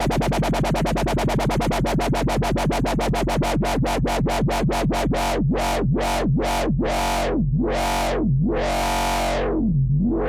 Screaming wobble sounds
bass, dubstep, sampled, wobble, electronic